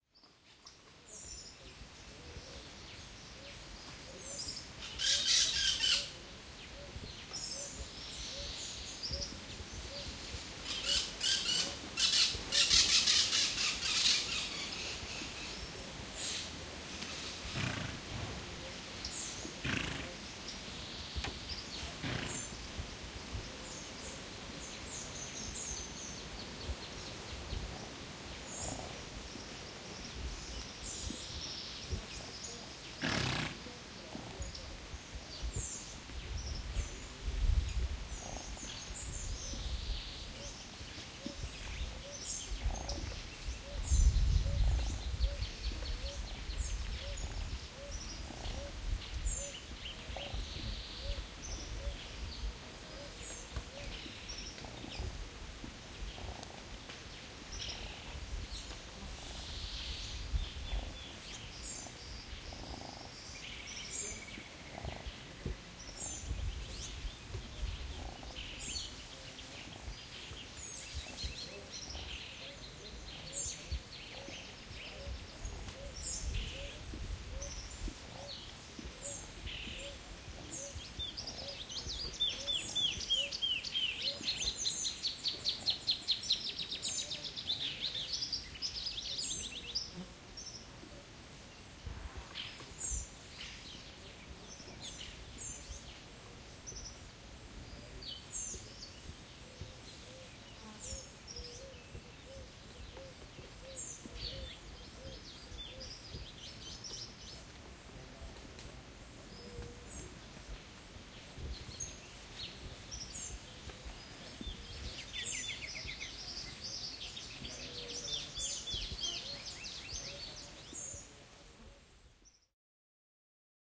LlanosVenezolanos Finca Animales
exotic, farm, field-recording, tropical-birds, venezuelan